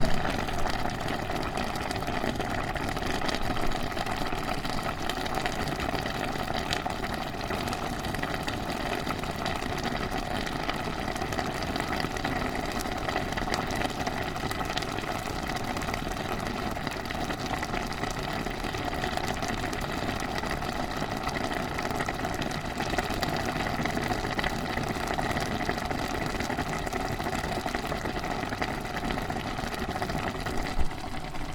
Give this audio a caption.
Close mic recording of boiling soup.